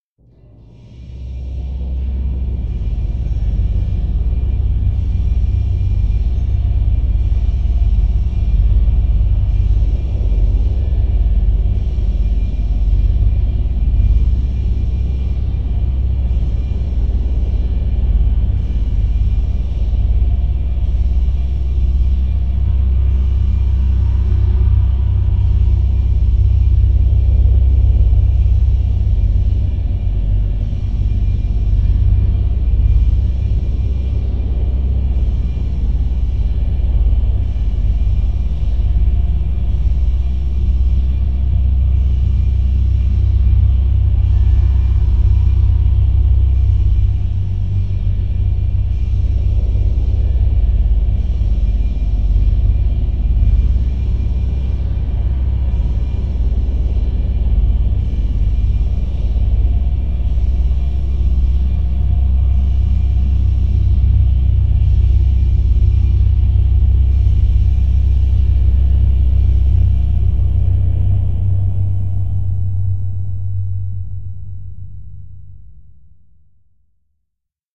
Room tone for a corridor in a science fiction movie. Various drones processed in Samplitude.
fiction, room